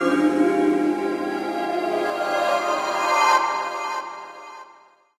This 'could' be the tune to the next gaming console bootup screen :)
boot, console, hardware, jingle, start, tune